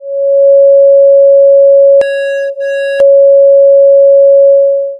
- Mono (frequency 555,amplitud 2)
- Amplification effect (11.5 dB)
- Phaser effect (from 2" to 3")
- Fade in effect (from 0" to 0.5")
- Fade out effect (from 4.5" to 5")